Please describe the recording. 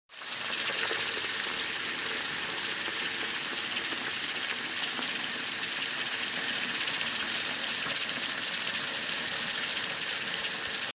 Sink - running water.